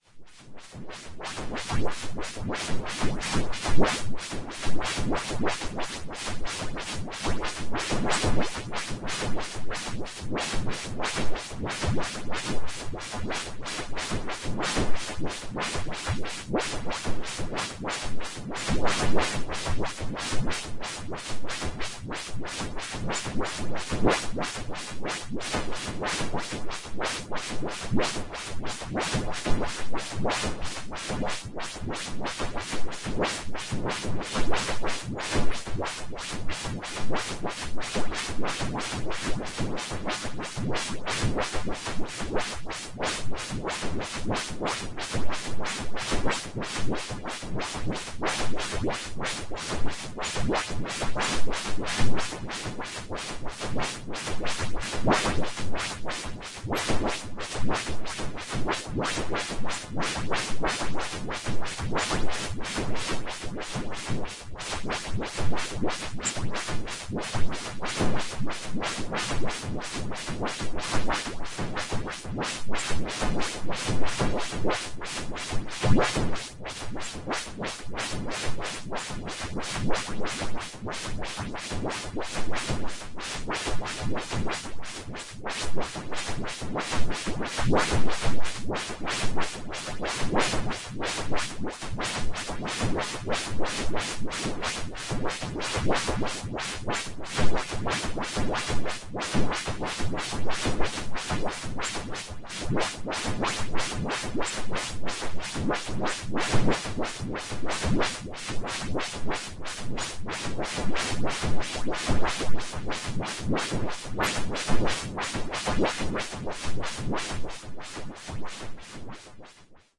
Noise Garden 27
1.This sample is part of the "Noise Garden" sample pack. 2 minutes of pure ambient droning noisescape. Noise with an LFO on the amplitude.